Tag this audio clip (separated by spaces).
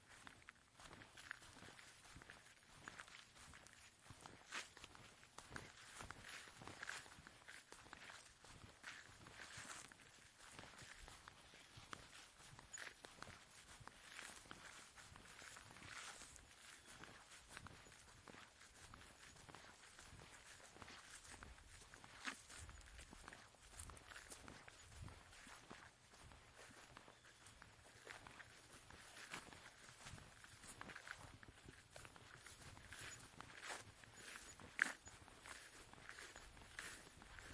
footsteps steps walking